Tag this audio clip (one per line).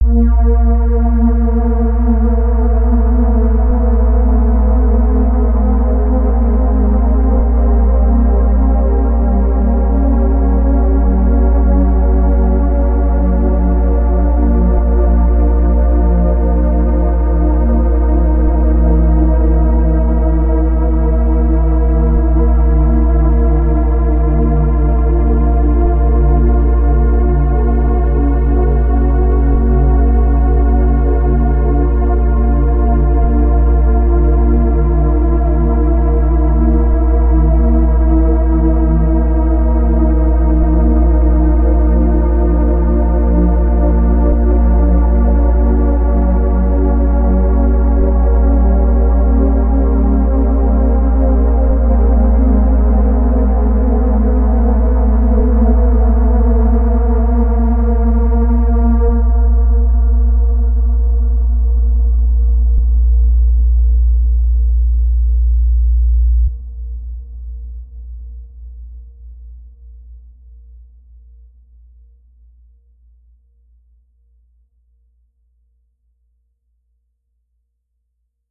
creepy
horror
ambient
spooky
thrill
drone
scary
anxious
eerie
sinister
suspense
haunted